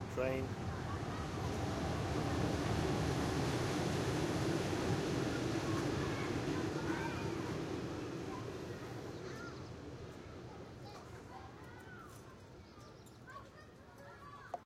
mySounds GWAEtoy train in playground
From the playground
playground, recording, school, field